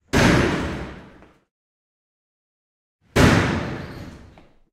door kick public bathroom door hit open slam echo
hit, slam, door, kick, bathroom, public, open